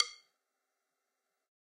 Sticks of God 001
drum, drumkit, god, real, stick